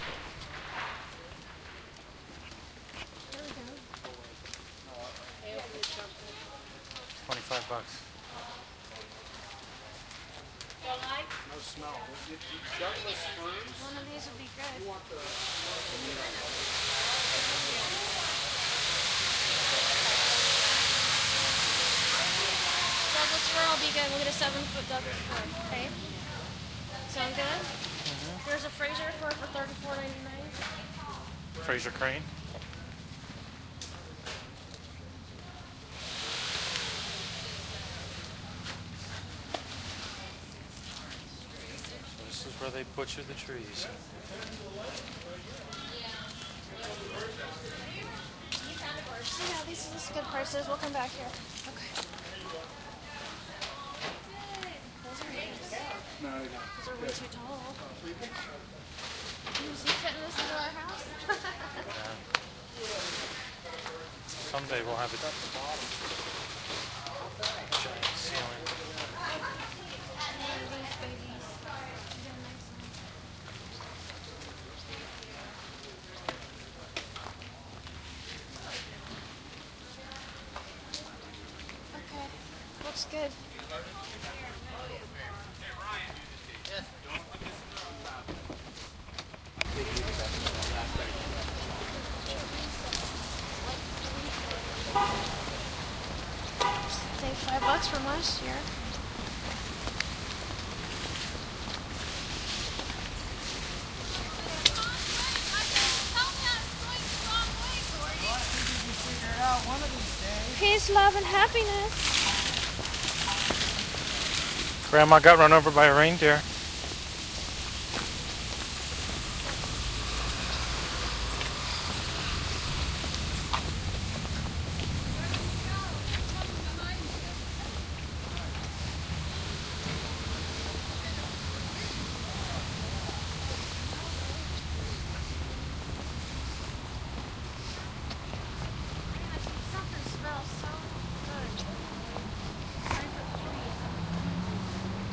Selecting a Christmas tree with a DS-40.